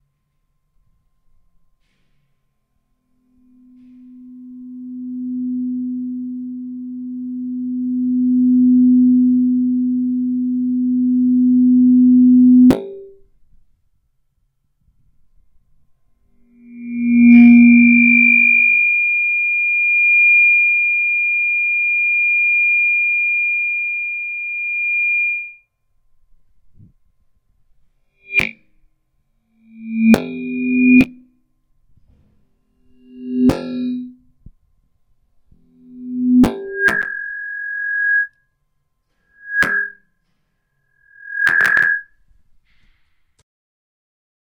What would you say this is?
Microphone feedback
Some feedback with shure SM58 and the JamVox monitor
Acoples generados con un Shure SM58 y el monitor de JamVox